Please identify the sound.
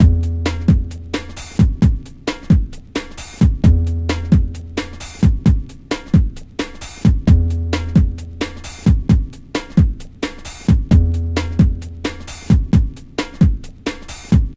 Breakbeat 4 you :p
beat, bigbeat, breakbeat